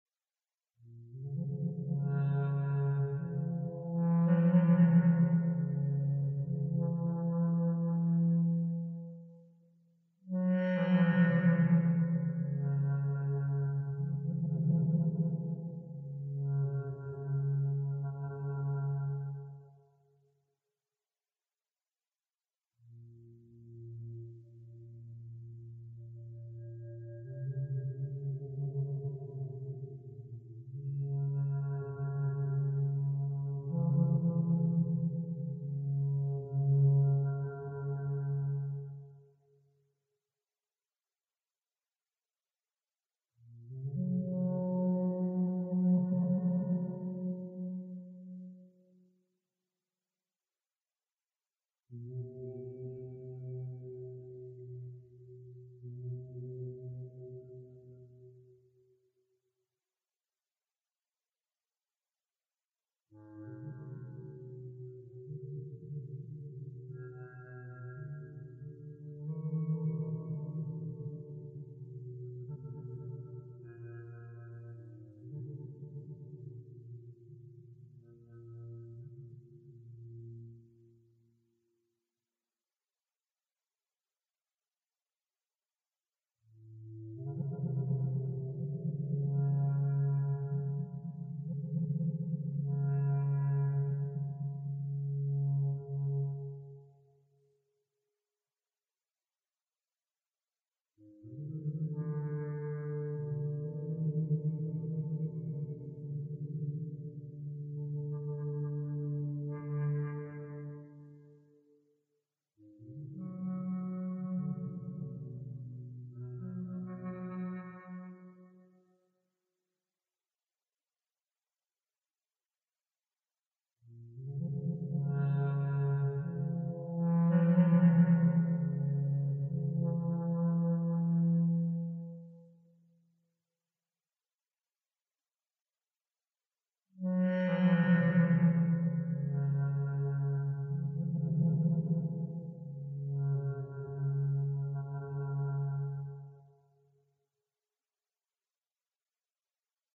A little recording I made, as the reeds of my first bass duduk begin to open for me a bit.
armenian, duduk